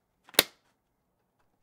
opening a binder